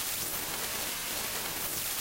generated white noise using CoolEdit. applied a light phaser and flange.
sci-fi, white, spacey, space, synthetic, noise